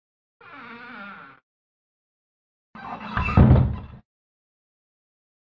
closing an old door
I recorded it with A Samsung ace3 and then put it through the Cubase 5 with fade in and fade out worked with some low cut in Cubase EQ and a gate for the too much noise of the old door
close, closing, door, old, wooden